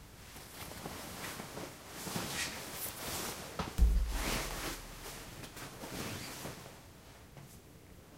Fast-dressing-and-undressing-jacket
Dressing and undressing autumn jacket